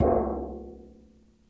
Beat on trash bucket (dark & long)
Barrel, Bin, Bucket, Can, Metall, Percussion, Trash